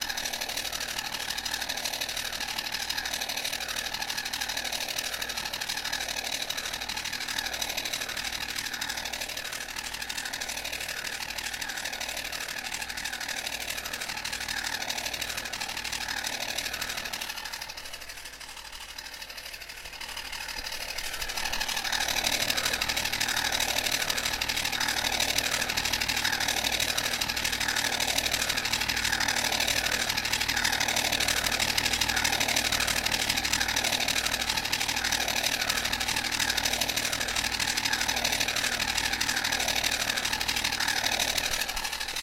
spaceship mixer
My wife got this strange little mixer made of plastic - it sounds like a futuristic flying car or something - very scifi. Goofy and bit steampunk maybe.
recorded with zoom h5
gadget, mixer, propeller, scifi, steampunk